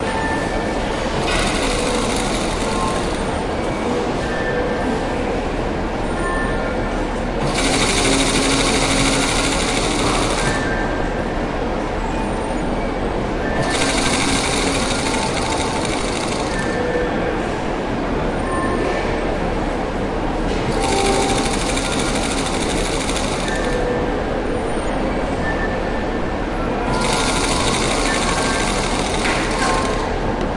cropped ste-138
found, sounds